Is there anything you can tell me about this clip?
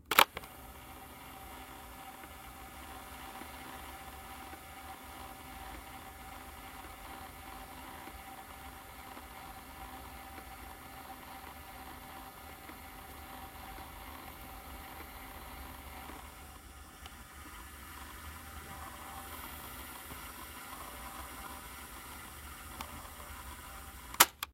Recording of me pressing the play button on a Casio AS-51R Cassette Player to record the whirring of the motors inside as it plays a cassette, then stopping it with the stop button.
Recorded With a Samsung Galaxy S21, edited with Audacity 2.4.2.